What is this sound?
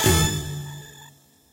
Selecting right answer - speed 4
selection, right, stab, correct, game, fast